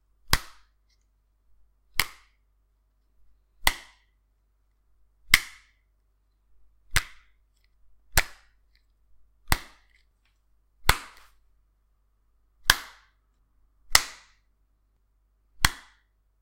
Catching apple
This is me throwing an apple into my own hand. It gives a very satisfying clap/slap sound.
apple, crack